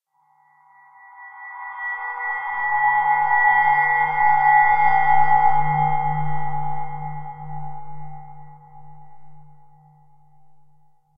a drone produced from heavily processed recording of a human voice